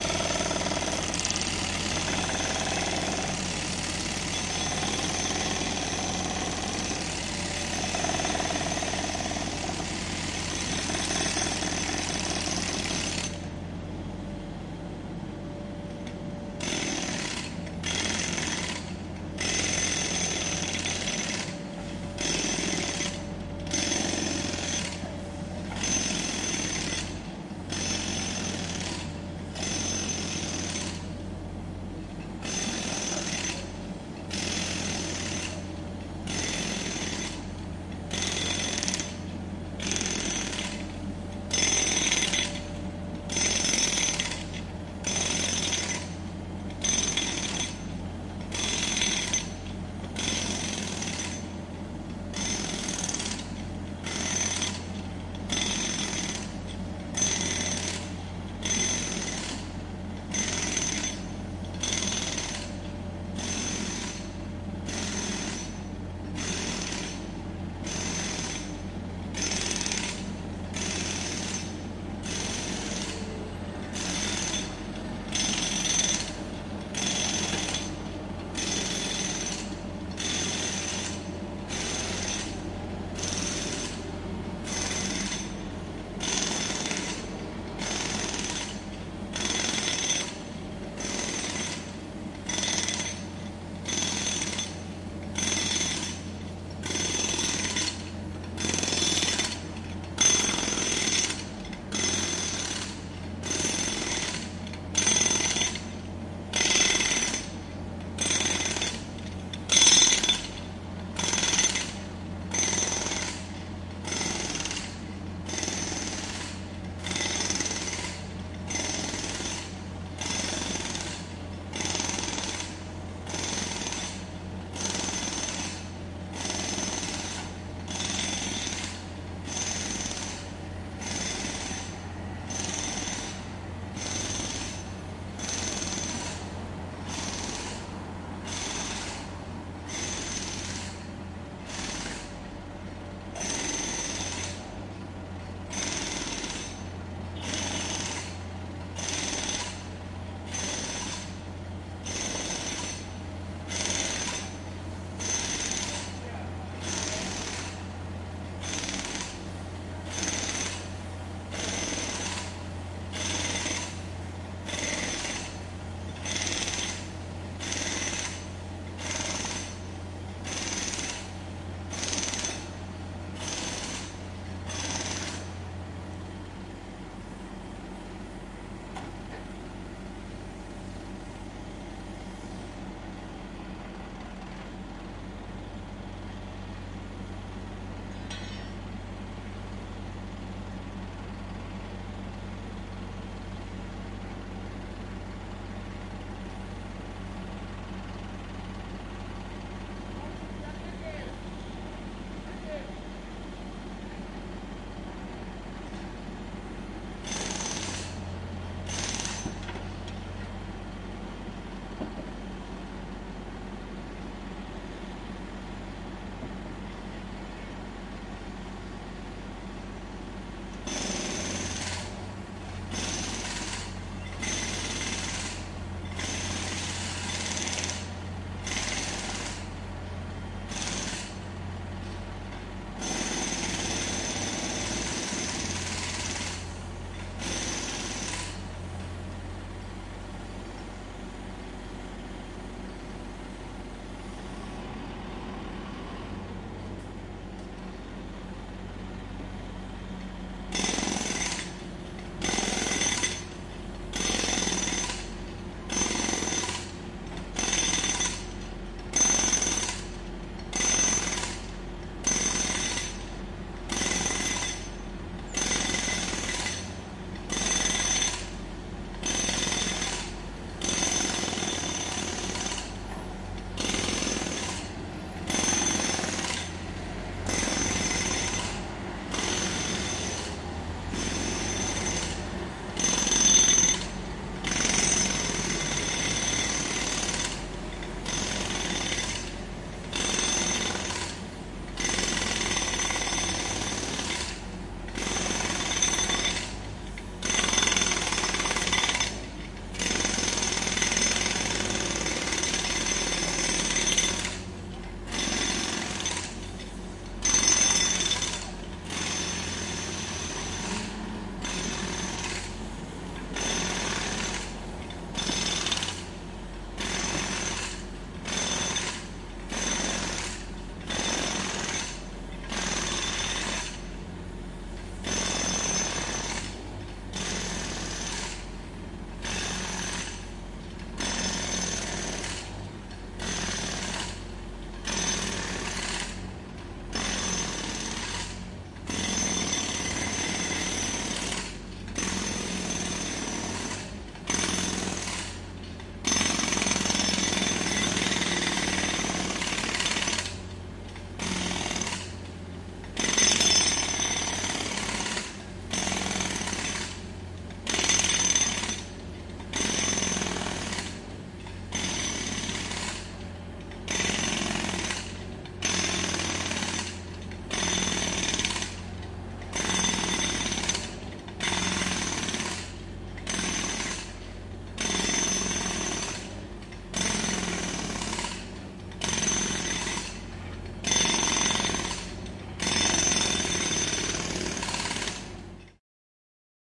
Road Works and Jackhammer
Recorded on an early saturday morning out of my window with a Zoom H2n M/S.
laut, road, jackhammer, construction, noisy, works, work, noise